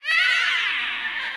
A soul wrecking high pitched voice sound effect useful for visages, such as banshees and ghosts, or dinosaurs to make your game truly terrifying. This sound is useful if you want to make your audience unable to sleep for several days.
arcade, banchee, Dinosaur, fantasy, game, gamedev, gamedeveloping, games, gaming, ghost, high-pitch, indiedev, indiegamedev, monster, RPG, scream, screech, sfx, Speak, Talk, videogame, videogames, vocal, voice, Voices, witch